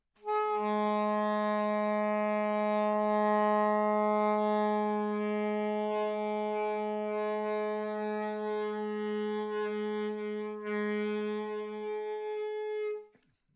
A long tone (approx. G#) on the alto sax, with varying harmonic content.